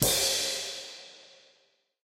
Record of a MEINL 14" HCS Crash with Beyerdynamics "mce 530"